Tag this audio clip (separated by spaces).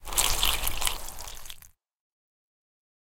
bathroom cloth fabric onto poured